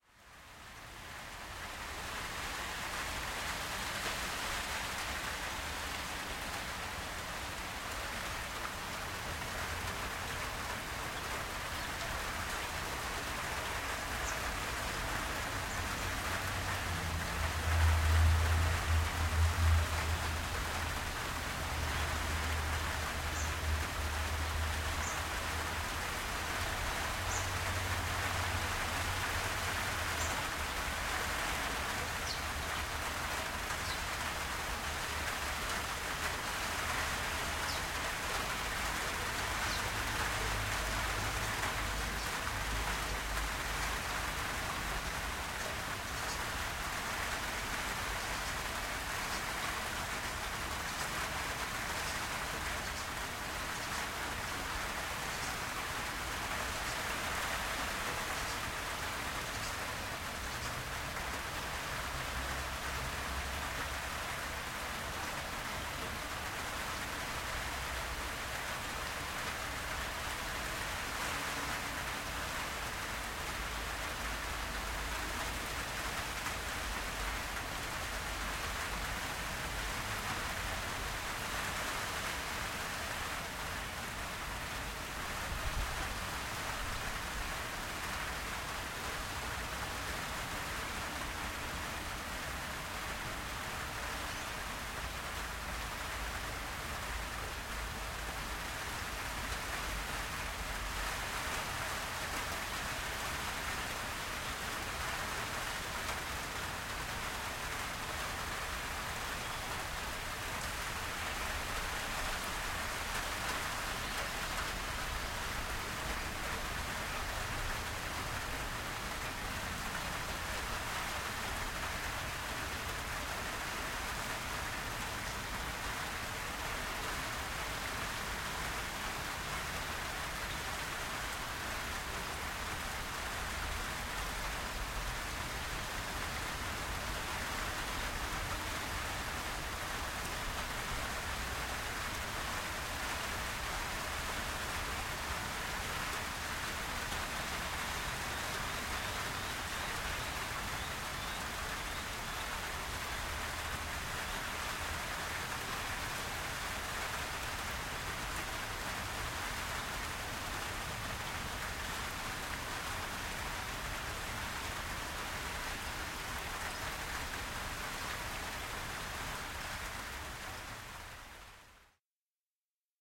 Medium heavy rain
ambiance, ambience, ambient, atmo, atmos, atmosphere, background, background-sound, field-recording, rain, soundscape, weather